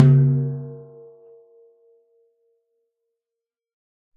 A 1-shot sample taken of an 18-inch diameter, 16-inch deep floor tom, recorded with an Equitek E100 close-mic, a Shure SM57 close mic and two Peavey electret condenser microphones in an XY pair. The drum was fitted with a Remo coated ambassador head on top and a Remo clear diplomat head on bottom.
Notes for samples in this pack:
Tuning:
VLP = Very Low Pitch
LP = Low Pitch
MLP = Medium-Low Pitch
MP = Medium Pitch
MHP = Medium-High Pitch
HP = High Pitch
VHP = Very High Pitch
Playing style:
CS = Cross Stick Strike (Shank of stick strikes the rim while the butt of the stick rests on the head)
HdC = Head-Center Strike
HdE = Head-Edge Strike
RS = Rimshot (Simultaneous head and rim) Strike
Rm = Rim Strike